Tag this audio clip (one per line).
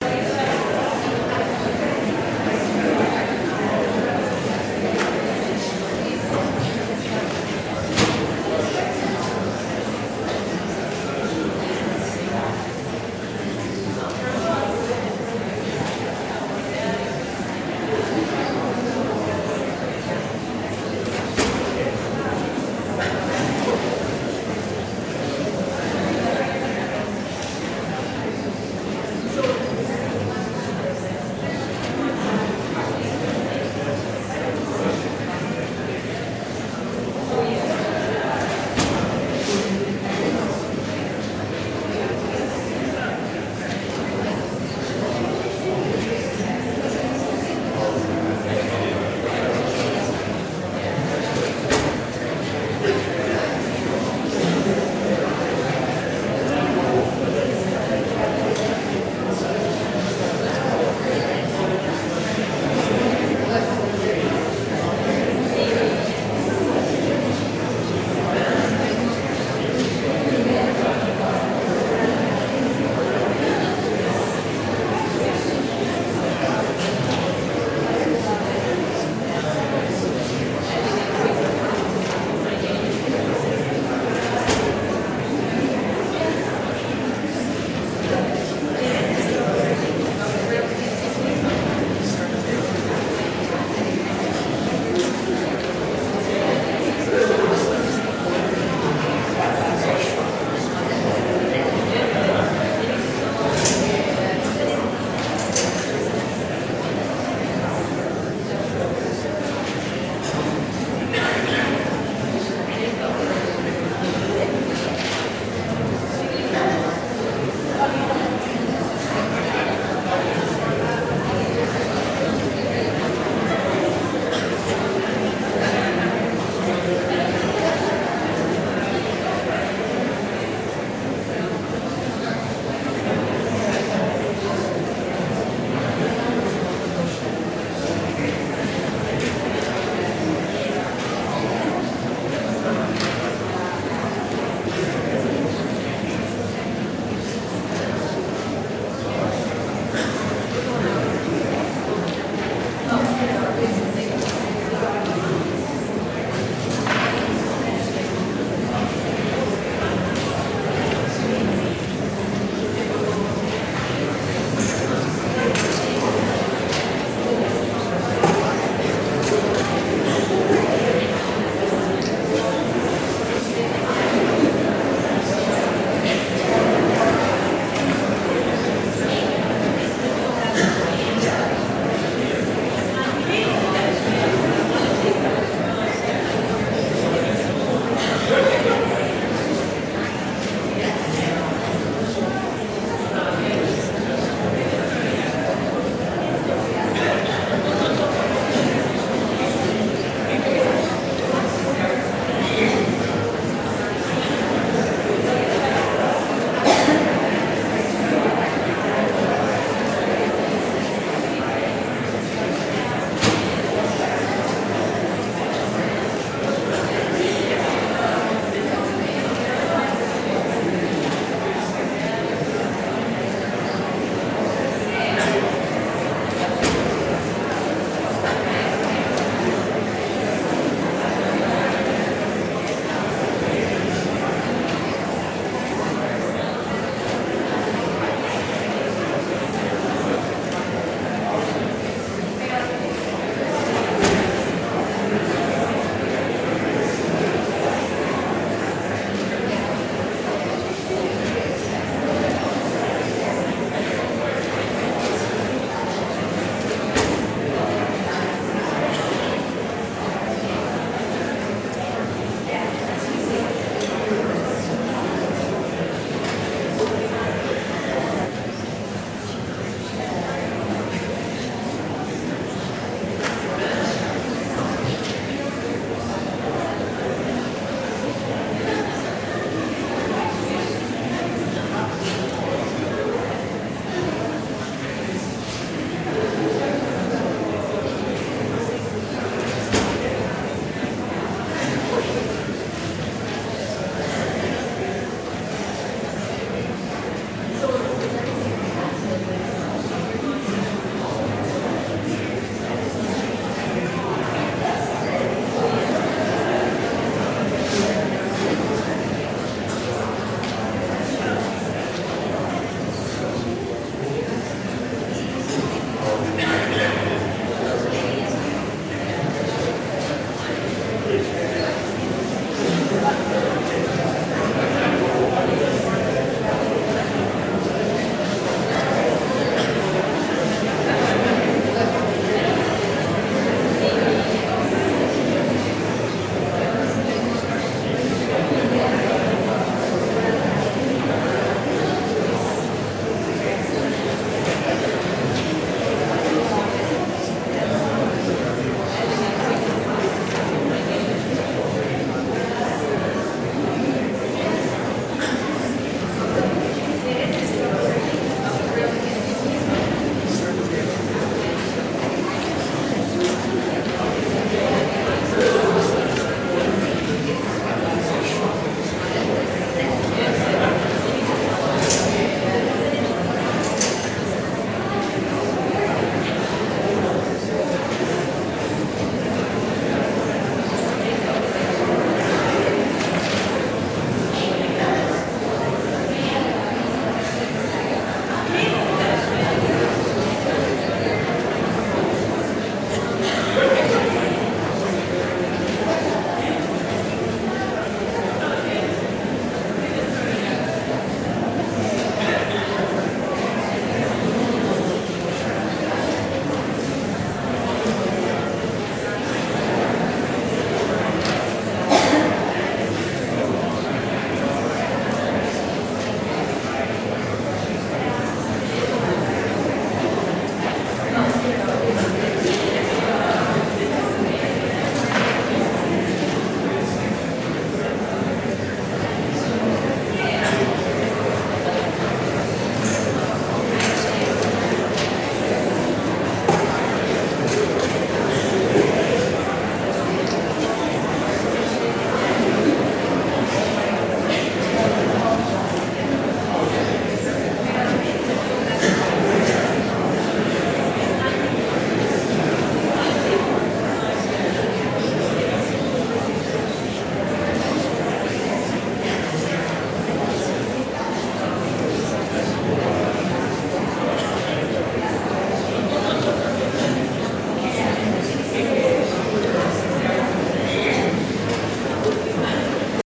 ambiance
ambience
murmuring
general-noise
voices
crowd
field-recording
concert-hall
ambient
people
talking